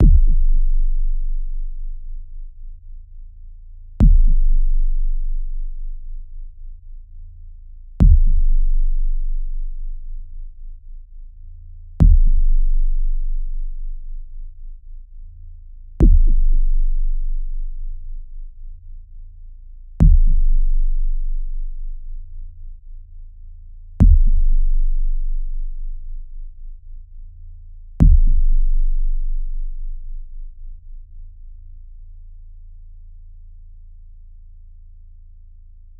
Basspad (Desolate)
bass, figure, pad, synth